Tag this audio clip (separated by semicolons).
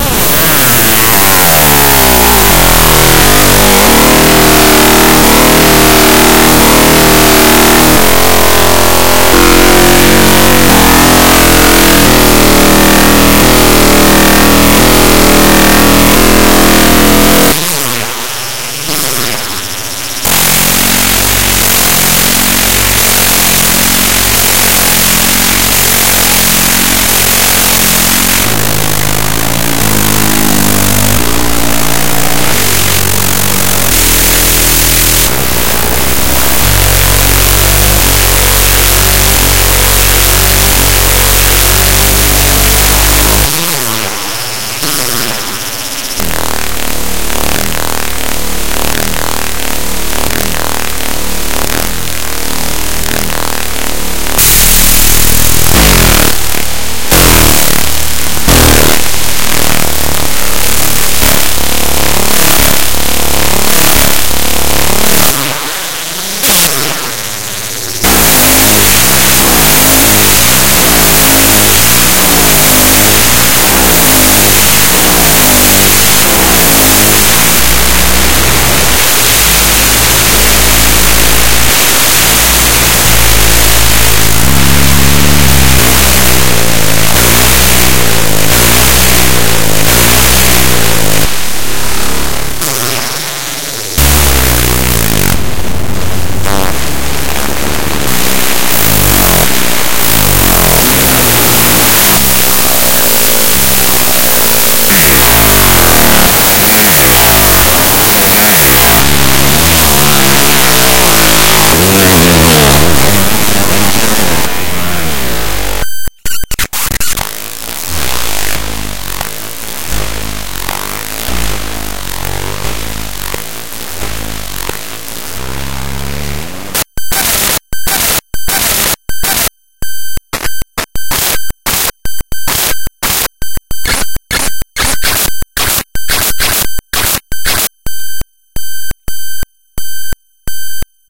Feed-back; Messy; Noise